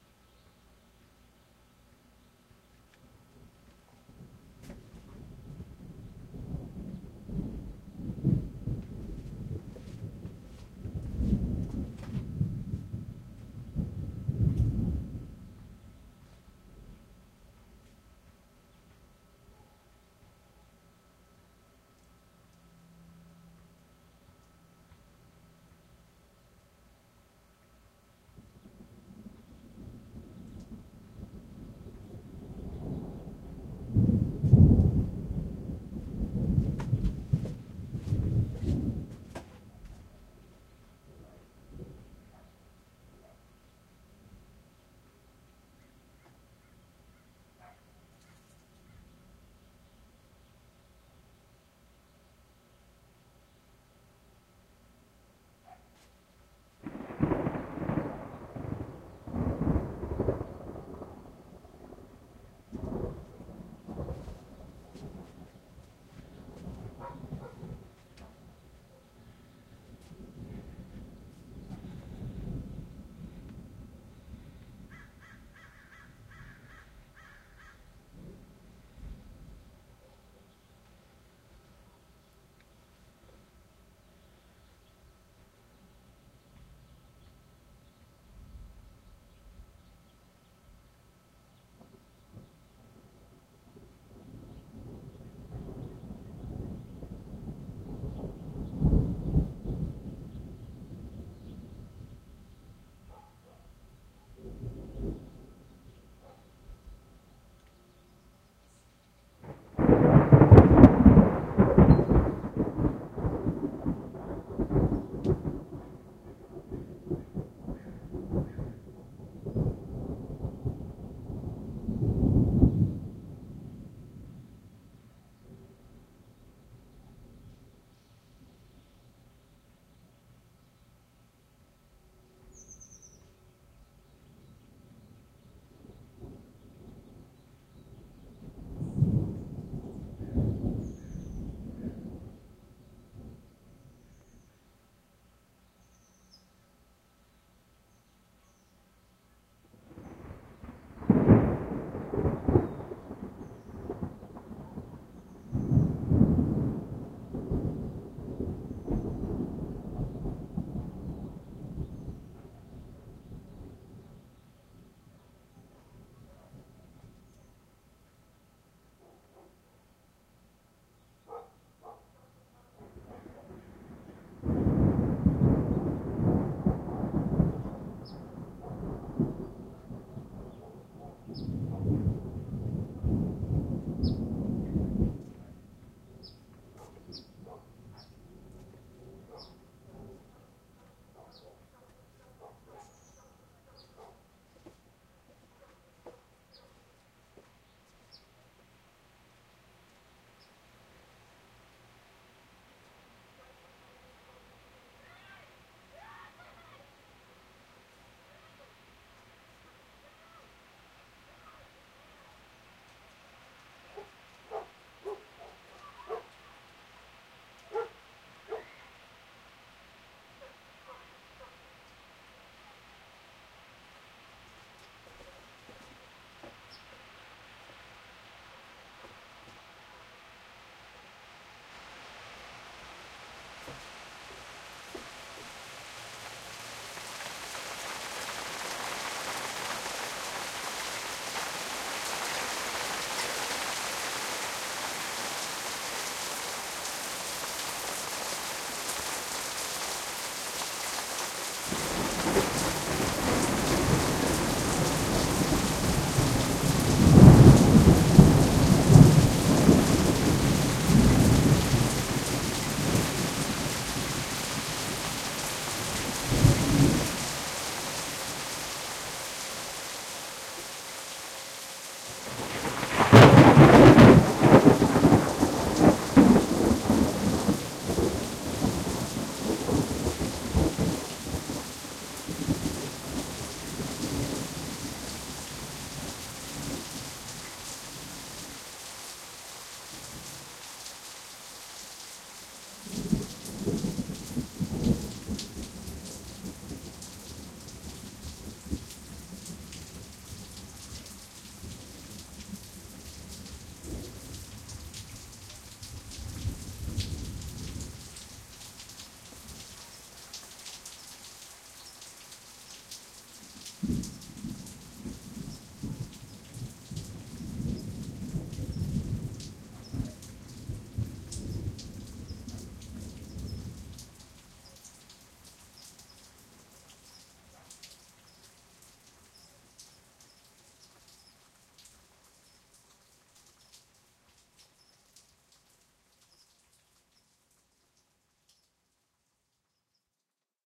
Stereo recording of a thunderstorm as it moved through. Includes thunder, rain, birds, dogs, kids. Some of the peek sounds are clipped. Good dynamic range.